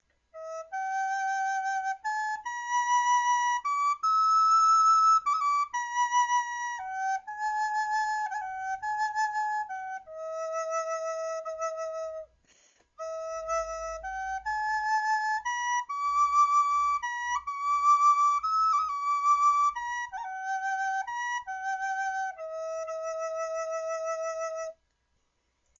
little E samplefile
This is a brief clip of one of my old flutes in the key of high E - and is WITHOUT REVERB. So far its the only raw file I have uploaded here in this way. I am also making available this same clip with reverb. It's a native american flute that I got two years ago, I always felt like it had kind of a 'cold' sound. I am not sure of the wood it is made from, but it is a high flute which means it plays one octave above a standard native american flute. Ironically, this is one of my favorite clips that I ever recorded for it,and the last one because I wanted to have a sound clip available for Ebay when I sold it. It has a new owner now though so this will be the only clip I make available for this flute.
happy, e, melody, native, high, tune, american, waltz, flute, raw